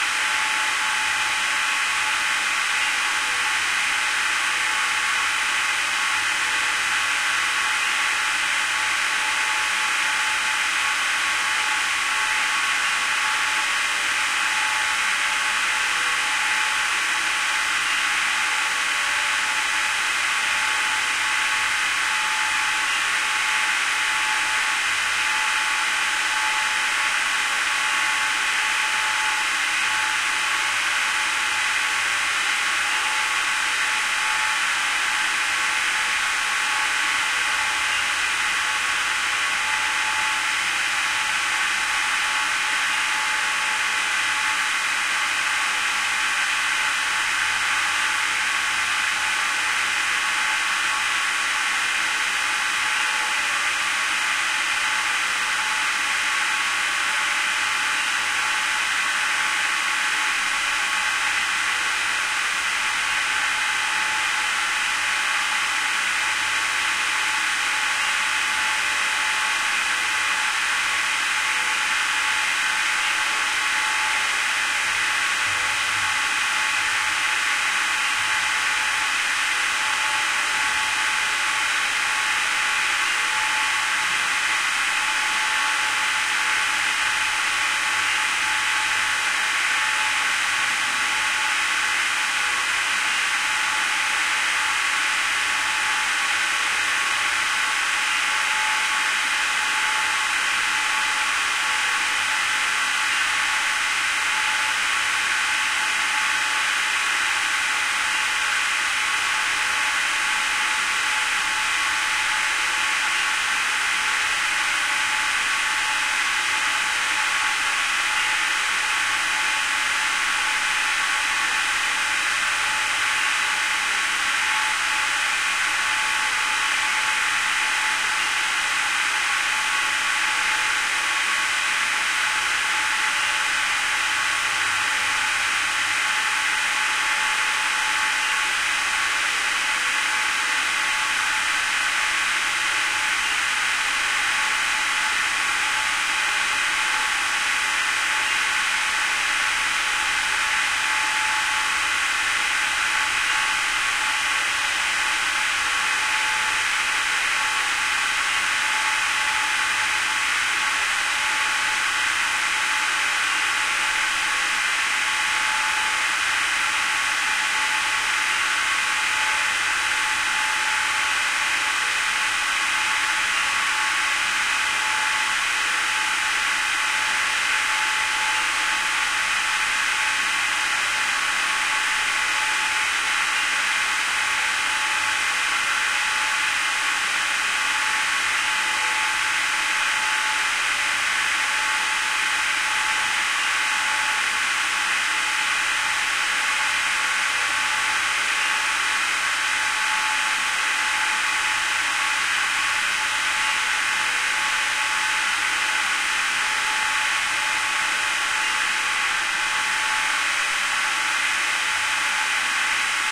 SW015 Piping Systems Gas Control Station Ambience Multiple Pipes TLM103 Perspective A
A hissing sound recorded at a non public industrial site for our "Piping Systems" sound collection, available here:
ambience, pipes, hissing, gas, pressure, release, industrial, drone, neumann